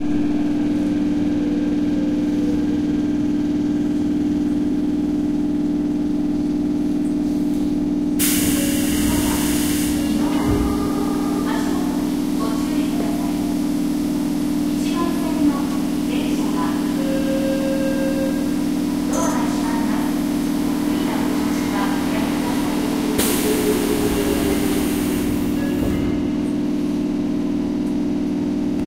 One of the many field-recordings I made in and around train (metro) stations, on the platforms, and in moving trains, around Tokyo and Chiba prefectures.
October 2016.
Please browse this pack to listen to more recordings.